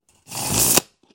measuring-tape
Measuring tape mechanism, rolling in, fast.
tape, measuring-tape, mechanism, rolling